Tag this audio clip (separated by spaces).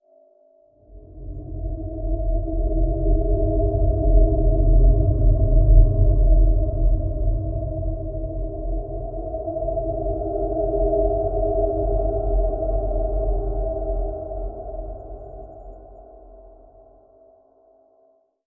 drone multisample soundscape pad artificial space